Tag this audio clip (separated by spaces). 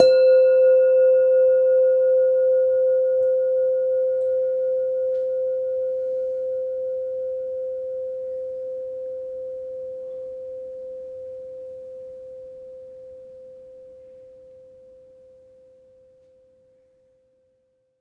bell bells bell-set bell-tone bong ding dong ping